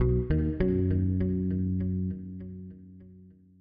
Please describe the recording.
Acoustic Bass Build (4th)
These sounds are samples taken from our 'Music Based on Final Fantasy' album which will be released on 25th April 2017.
Acoustic-Bass, Bass, Acoustic, Build, Sample, Music-Based-on-Final-Fantasy